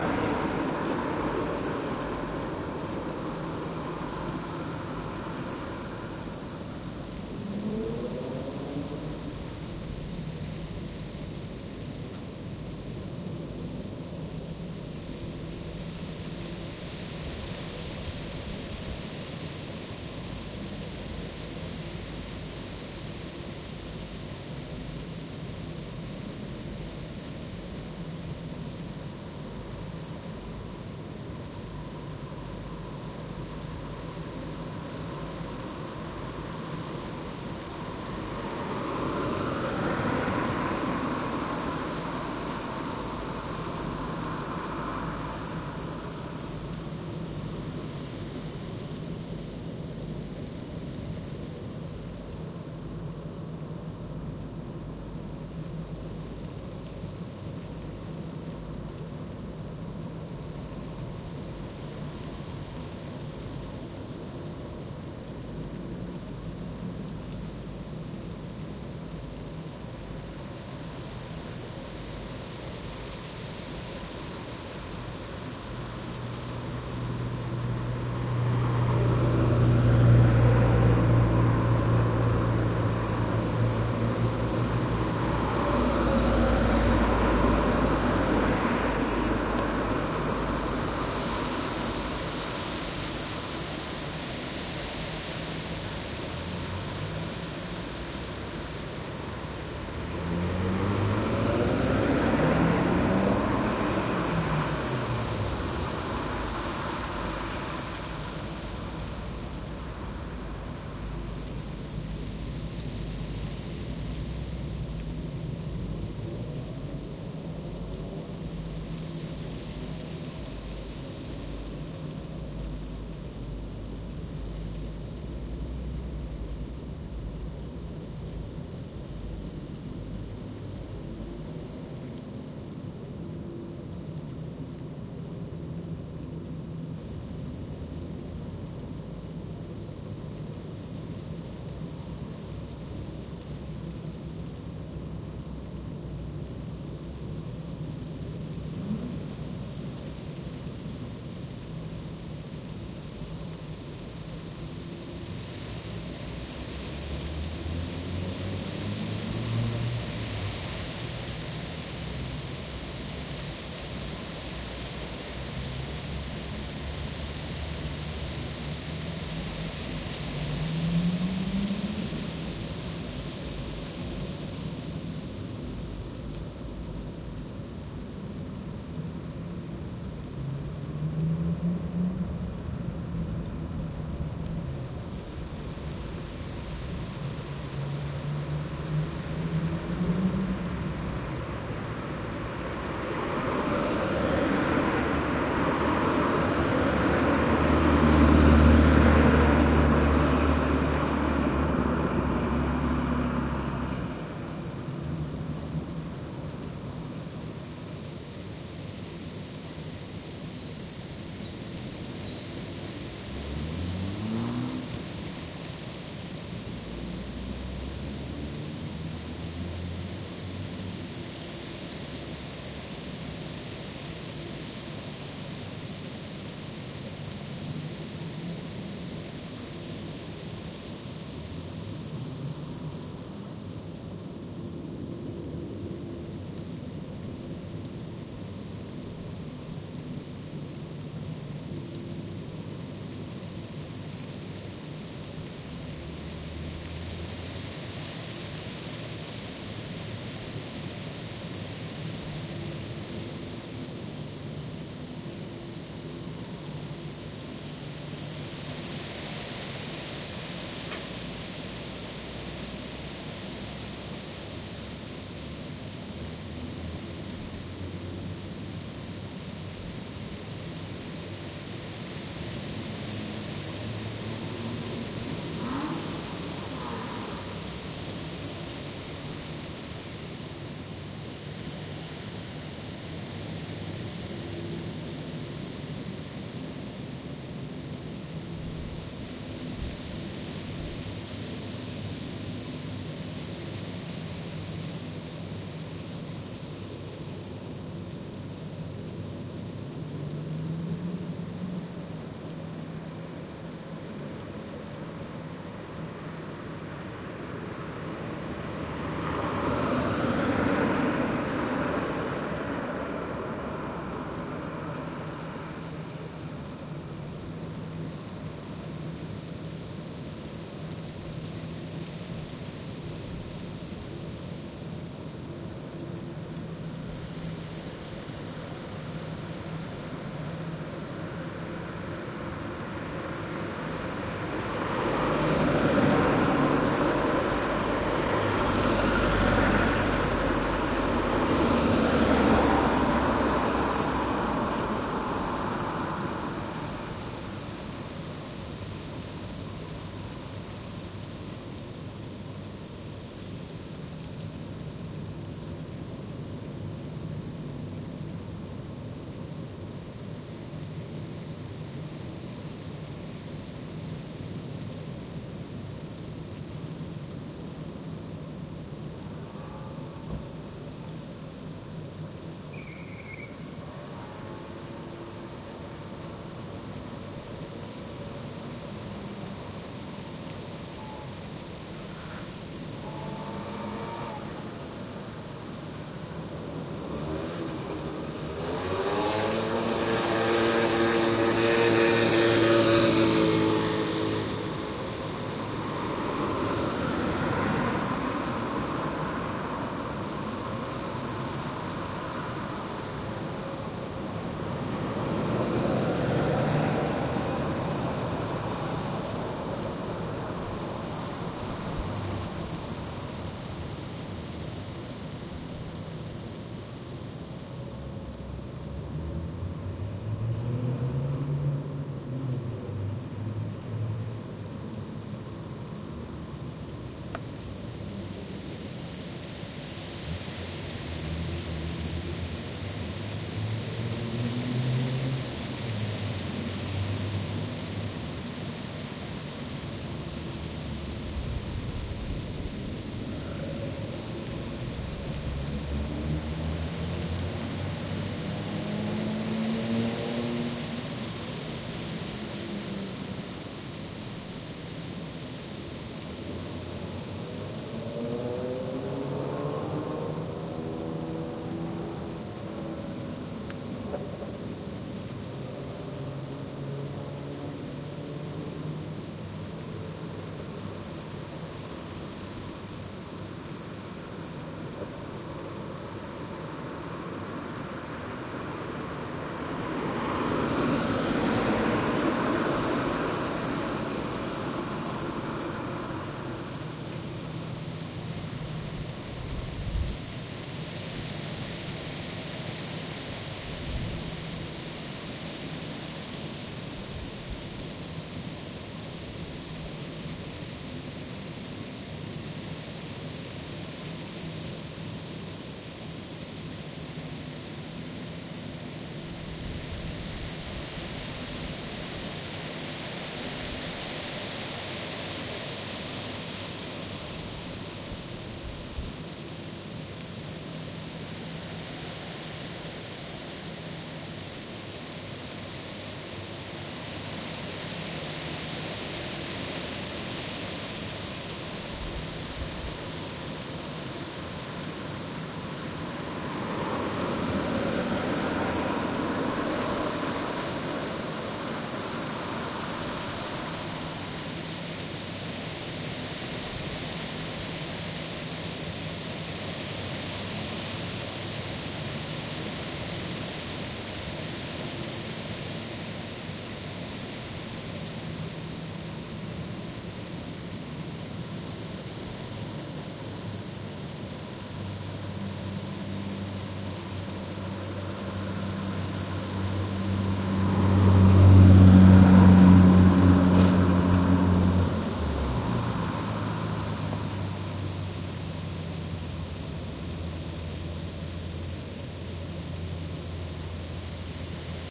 Kemi 011008 klo 2128
Windy evening in city Kemi ,Finland. Recorded 1.10.2008at 9.30 pm from the second floor window with ZoomH2 recorder.You can hear leaves (aspen)in wind.
zoomh2, finland, leaves, cars, wind, evening, kemi, flickr